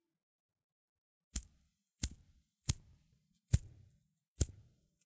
Short clip of fidget ring edited to sound like a lighter being flicked.